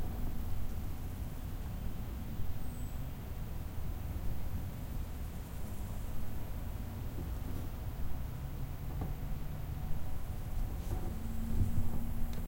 meadow, fly, crickets